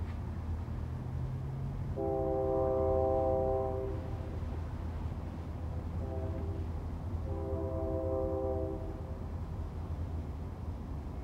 Two or three blasts from a train horn recorded from probably a mile away from the train in my apartment in the middle of the night. Some light traffic noise.